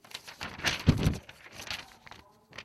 es-papershuffle
paper, rustle, shuffle